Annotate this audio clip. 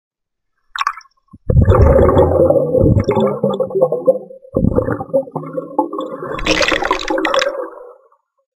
Bubbles cam
This is the audio extracted from an underwater camera, filming about 1.5 meters below the surface. The bubbles you can hear are from the videographer breathing out.